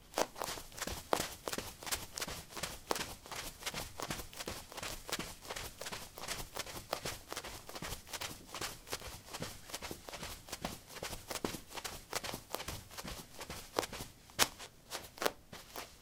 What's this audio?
carpet 09c highheels run
Running on carpet: high heels. Recorded with a ZOOM H2 in a basement of a house, normalized with Audacity.